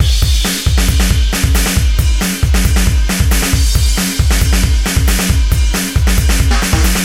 killdacop drums 136 07
Segmented group of loops from a self programmed drums.Processed and mixed with some effects.From the song Kill the cop
beat, drum, electronic, loop, rock